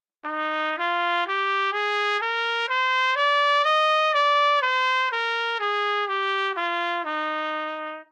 Trumpet - B major
Part of the Good-sounds dataset of monophonic instrumental sounds.
instrument::trumpet
note::B
good-sounds-id::7335
mode::major
Bmajor, good-sounds, neumann-U87, scale, trumpet